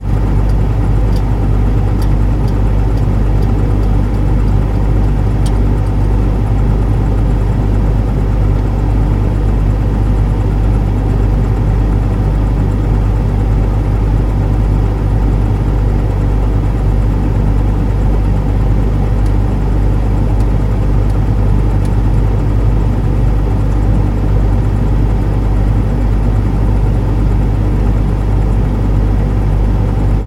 recording inside the freezer
appliance, fridge, kitchen, refrigerator, cooler